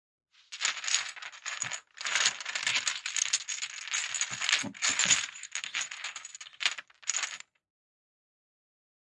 Dominoes shuffling, classic latin game
dominoes, casino, gambling, shuffle